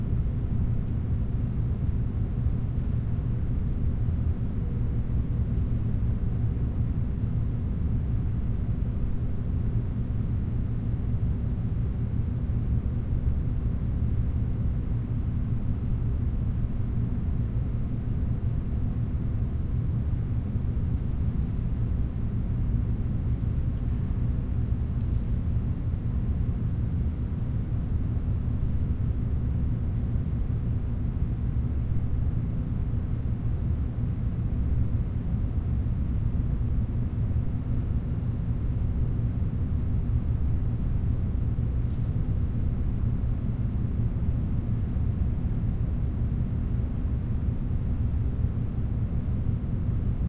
room tone large empty bass hum rumble mosque
rumble, empty, room, large, mosque, hum, tone, bass